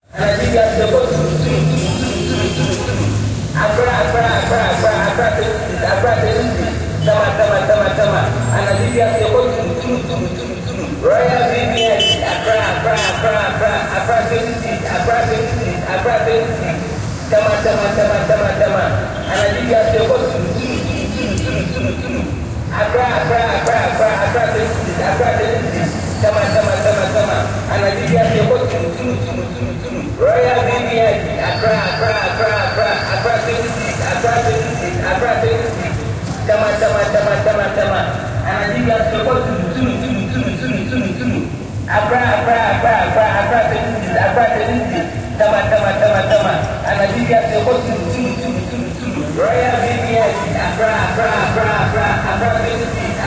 Ghana bus station megaphone
On a bus station in Kumasi (Ghana, West Africa) an employee of a coach company announces using a megaphone the cities to which the buses are going (Accra, Tema).